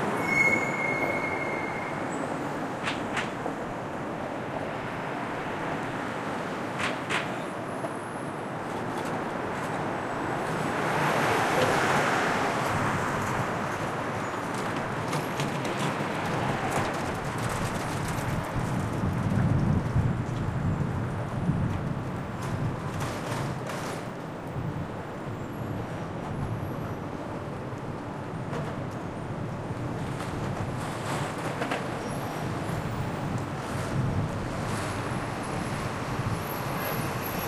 Mid range field recording of the area in front of the New York Times building in New York, taken next to the building on the corner of 8th Ave and West 40th St. Cars can be heard driving by, horns are honked, brakes are trodden etc. A sweeper vehicle features sometimes.
People can rarely be heard, as the recording was done at about 6 AM on a Saturday morning in March 2012.
Recorded with a Zoom H2, mics set to 90° dispersion.